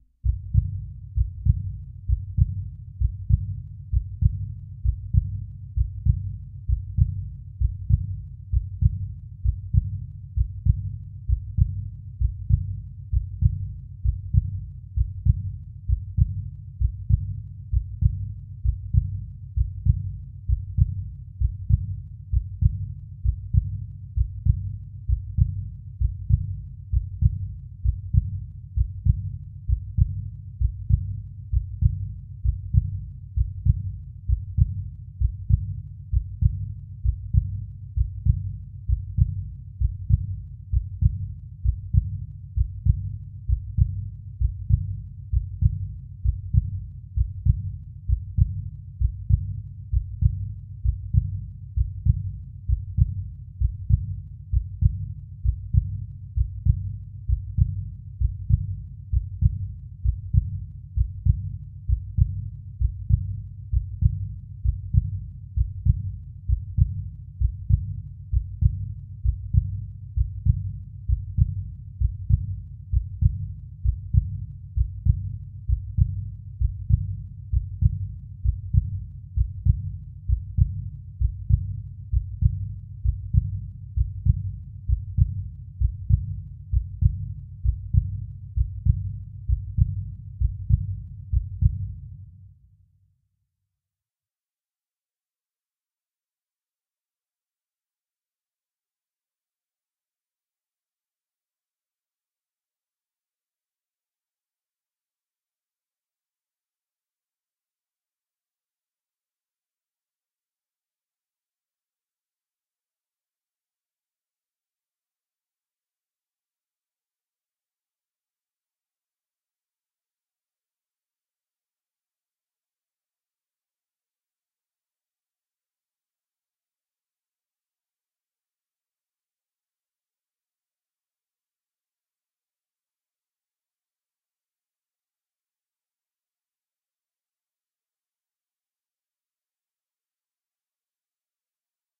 Heartbeat sfx w/ reverb
Modified heartbeat created in Ableton Live with an ambient reverb.
heart, heart-beat